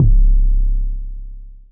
Ultra Subs were created by Rob Deatherage of the band STRIP for their music production. Processed for the ultimate sub experience, these samples sound best with a sub woofer and probably wont make alot of sound out of small computer speakers. Versatile enough for music, movies, soundscapes, games and Sound FX. Enjoy!